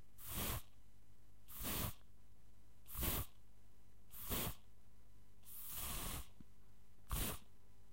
Deodorant Spray
Spraying a bottle of Deodorant. Recorded with the Rode Videomic into Audacity. Removed noise.
spraying, deo, deodorant, can, spray, foley